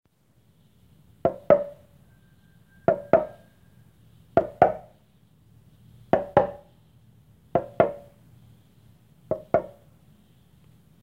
Golpe casco
casco, objeto